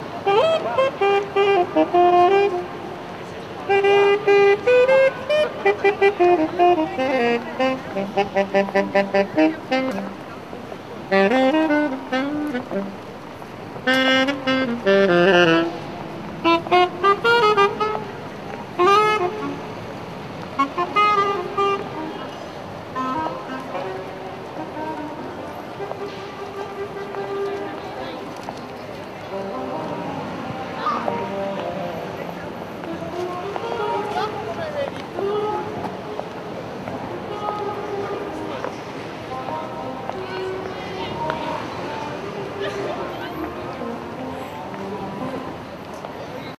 Emmanuelle Sax
Mono clip of saxophone musician playing in a nearly empty square. Recorded using AT Shotgun mic & a Beachman Omni-mic. The echo of the sax can be heard as well as people walking and talking nearby. Sound clip is designed so that it sounds like you are passing the musician. Last half is the saxist playing in the distance.
saxophone, ambient, square, italy, saxist, musician, saxophonist, rome, walking, town, people